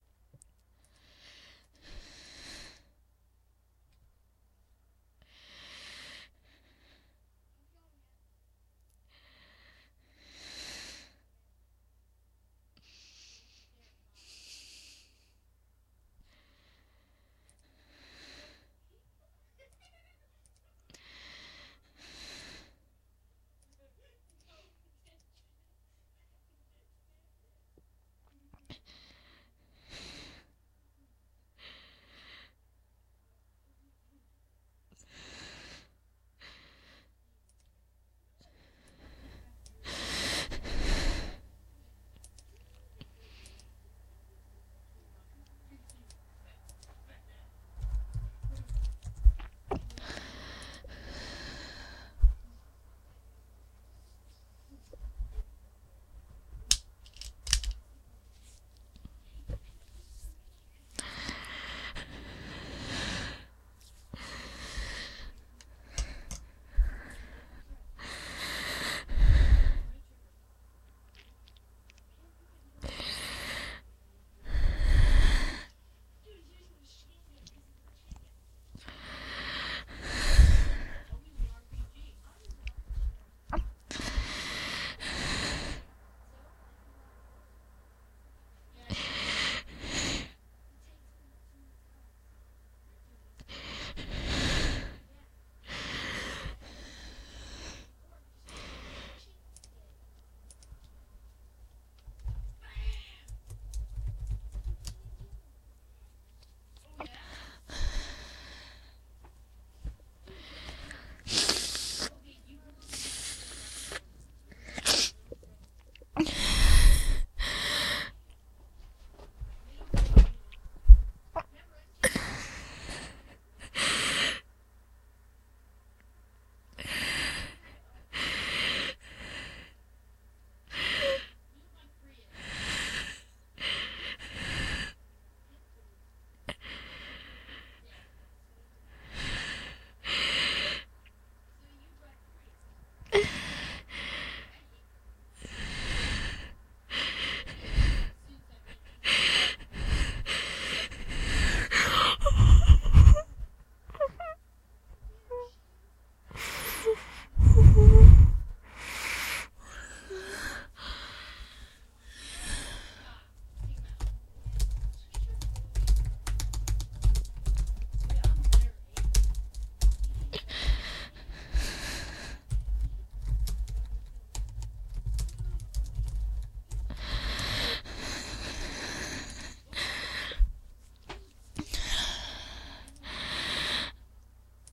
girl trying to cry quietly
bed, mouth, upset